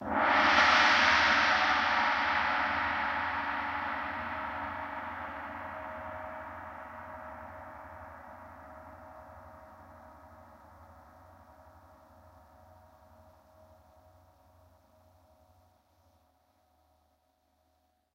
Multi velocity recording of a full-size 28" orchestral symphonic concert Tam-Tam gong. Struck with a medium soft felt mallet and captured in stereo via overhead microphones. Played in 15 variations between pianissimo and fortissimo. Enjoy! Feedback encouraged and welcome.